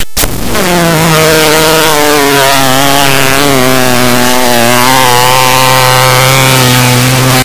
A strange sound made from playing back a raw image file of noise with Gaussian blur.
noise, raw